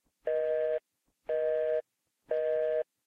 A busy tone I recorded right from the phone's speaker
beep, busy, phone, pulse, telephone, tone